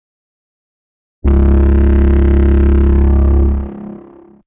Custom Reaper Horn
Credits to Kendog88 for the base sound.
I found their sound while searching for reaper-like sound effects on here, and the sound they made resembled that of the Mass Effect reaper, so I decided to overlay some distortion and put a wave filter over the sound, to give it a vibrating feel. Enjoy
Alien, Futuristic, Horn, Improvisation, kendog88, Machine, Mass-effect, Reaper, Sci-Fi